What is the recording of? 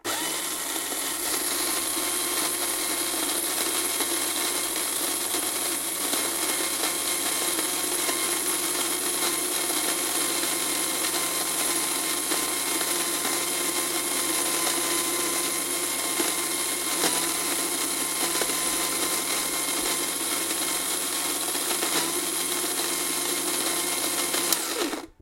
Mixing some eggs and sugar with a handheld mixer.